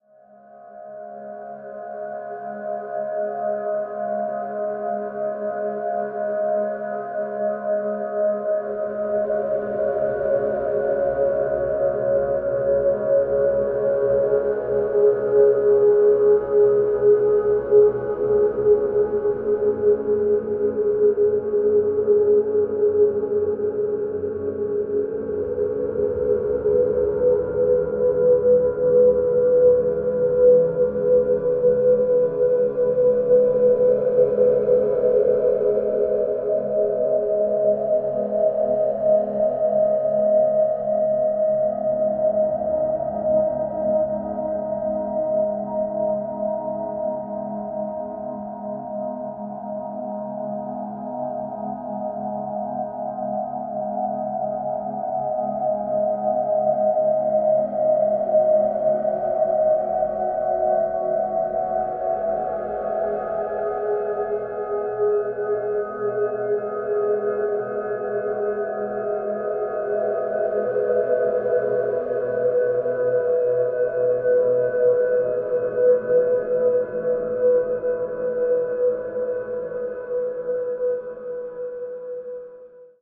Elementary Wave 12
Ambiance, Ambience, Ambient, Atmosphere, Cinematic, Drums, Loop, Looping, Piano, Sound-Design, commercial